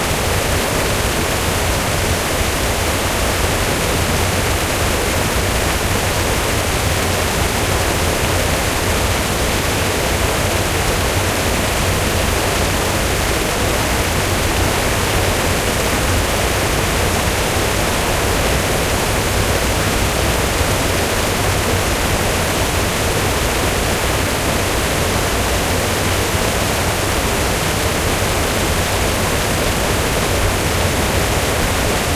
Sluice of the "Canal du Midi" ORTF
A close up, quite agressive sound of a sluice flowing at maximum. Recorded at the Canal du Midi, Moissac, France, with a Nagra LB and 2 BeyerDynamic MC930 (ORTF).
field-recording, waterfall, flow, MC930, nature, Nagra, close-up, river, stream, water, LB